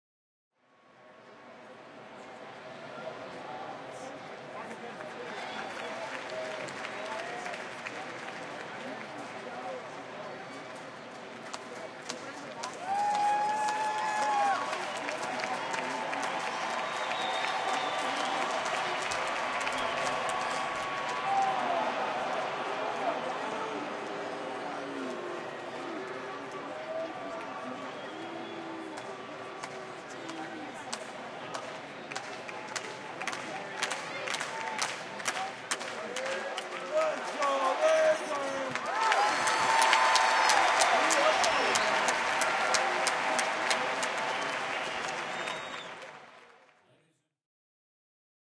WALLA Ballpark cheering and booing
This was recorded at the Rangers Ballpark in Arlington on the ZOOM H2. The crowd cheers, then boos, then cheers again.